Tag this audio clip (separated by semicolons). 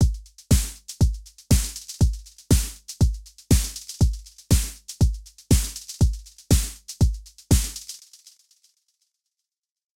120bpm; beat; electro; loop